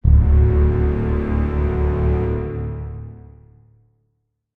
Angry Boat 2
The epic and ominous "BRRRRRRRRRM" sound often found in movie trailers, such as Inception, Shutter Island and Prometheus. I've nicknamed it the 'Angry Boat'.
Made with GarageBand for iPad.
Inception, Shutter-Island, ominous, movie-trailer, tension, epic, BRRRRRRRRRRRRM, Prometheus